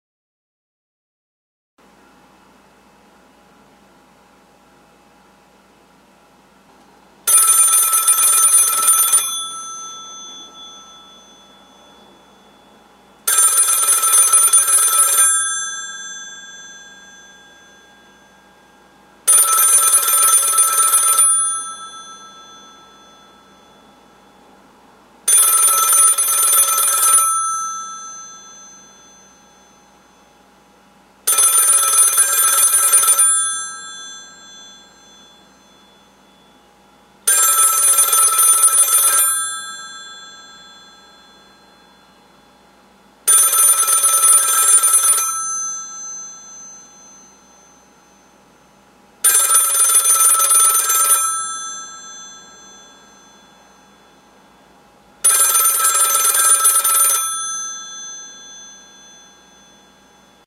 Old Rotary Phone Ringing - Western Electric Bell 500 phone
Rotary phone ringing from a 1950s Western Electric 500 telephone. Telephone brass bells ringing. Bell Northern Electric model 500 phone.